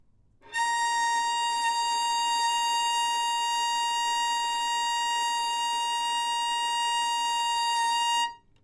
overall quality of single note - violin - A#5
Part of the Good-sounds dataset of monophonic instrumental sounds.
instrument::violin
note::Asharp
octave::5
midi note::70
good-sounds-id::2500
Intentionally played as an example of bad-richness-bridge
multisample, Asharp5, violin, good-sounds, neumann-U87, single-note